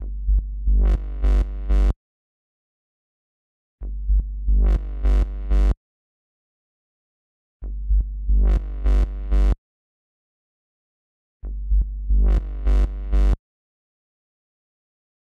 aisha 09 24 10 126bpm bass bursts A
This is a synthesized bass loop I made using Ableton Live.